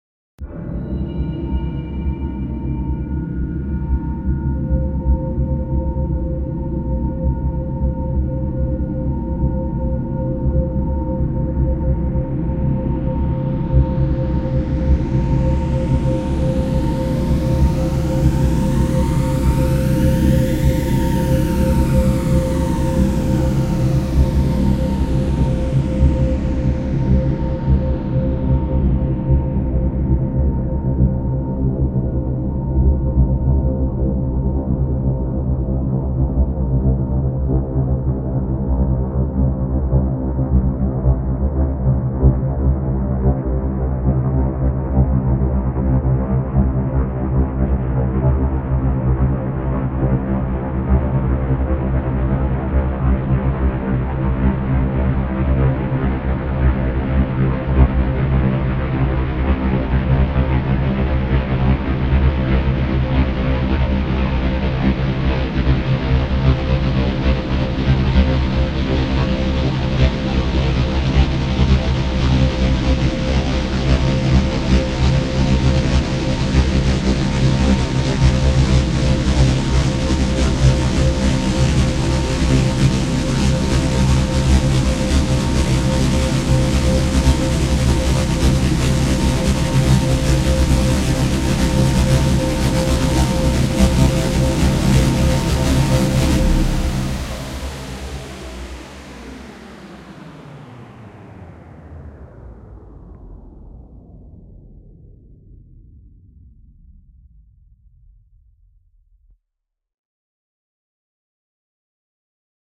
UFO landing Sound design
Support me Here :
alien, drone, fx, sci-fi, sfx, space, spaceship, ufo